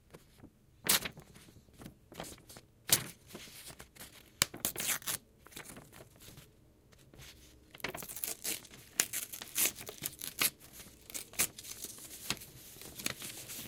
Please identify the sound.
Ripping a page apart
tear, tearing, tearing-paper